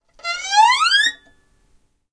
Violin, Glissando, Ascending, A (H6 XY)
Raw audio of a quick violin string glissando ascending. Recorded simultaneously with the Zoom H1, Zoom H4n Pro and Zoom H6 (XY Capsule) to compare the quality.
An example of how you might credit is by putting this in the description/credits:
The sound was recorded using a "H6 (XY Capsule) Zoom recorder" on 11th November 2017.
ascending, glissandi, glissando, H6, rising, string